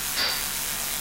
A great mechanical loop for use in a video game, movie or music.
machine, mechanical, robot, robotic, factory, industrial, loop